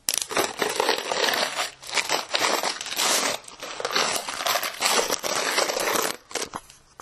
bottle of coins
Shaking a water bottle filled
to the brim with coins.